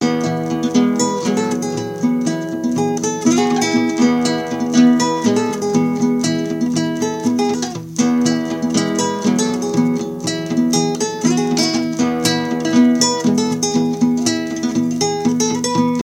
OLD FOLK Guitar
A collection of samples/loops intended for personal and commercial music production. All compositions where written and performed by Chris S. Bacon on Home Sick Recordings. Take things, shake things, make things.
voice, melody, indie, Indie-folk, harmony, guitar, Folk, drums, beat, acapella, rock, loop, free, drum-beat, loops, vocal-loops, bass, synth, percussion, sounds, acoustic-guitar, samples, original-music, looping, piano, whistle